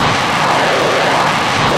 Rocket Loop
Jet, Engine, Missile, Rocket, Loud